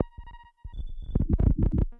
YP 120bpm Plague Beat A06
Add spice to your grooves with some dirty, rhythmic, data noise. 1 bar of 4 beats - recorded dry, for you to add your own delay and other effects.
No. 6 in a set of 12.
120-bpm, 120bpm, 1-bar, 4-beat, beat, dance, data, digital, drum, drum-loop, drum-pattern, drums, electronic, glitch, glitchcore, glitchy, idm, loop, minimal, minimalist, noise, percs, percussion, percussion-loop, percussive, rhythm, rhythmic, up-tempo, uptempo, urban